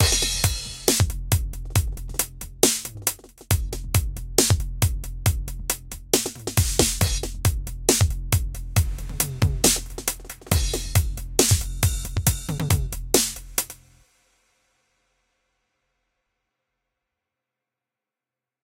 DubstepBeat SnakeFx
Quality Beat for dubstep, designed/processed generously, ready for use in songs around 130 bpm(not sure what bpm). Original, authentical and "never used". :D
120, 130, 140, 150, 160, artificial, Beat, complex, compressed, Drums, Dubstep, free, good, loop, Pro, processed, quality, special